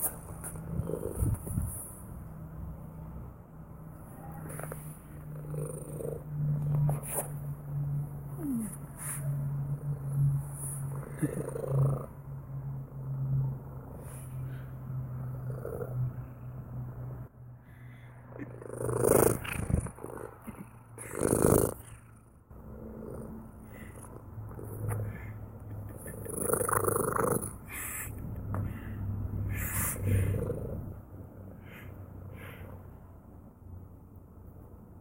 Purring Cat
Happy cat, the best sound. Warning: there's a loud bit where they got very close to the mic. May require further editing before use.
cats, purr, cute, purring, domestic, cat, animal, pets